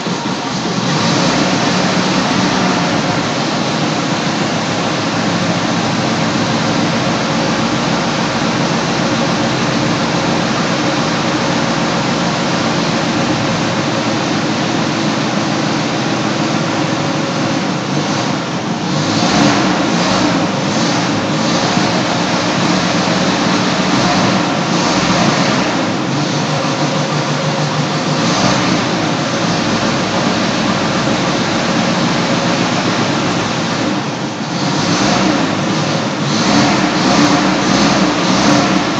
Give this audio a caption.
a take from a car engine